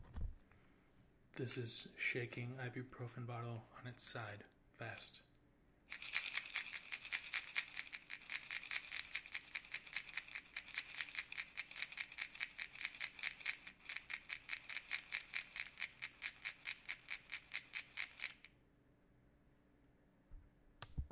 FOLEY Shaking ibuprofen bottle on side fast
What It Is:
Shaking ibuprofen bottle on side (fast).
Shaking aspirin bottle on side (fast).
plastic, pill, aspirin, ibuprofen, shake, foley, bottle, AudioDramaHub